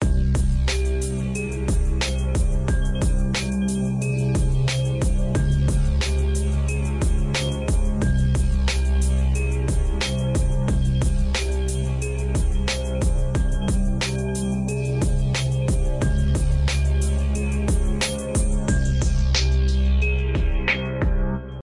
Piano keys underground hip hop rap.
Made on FL Studio with stock sounds.
Credits:
Written by: NolyaW
Produced by: Nolyaw and R3K4CE
Beat, Dream, Hip, NolyaW, R3K4CE